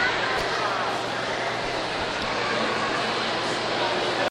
Inside the Smithsonian Museum of Racism and Genocide recorded with DS-40 as the left microphone mysteriously stopped working and salvaged as a monophonic recording in Wavosaur.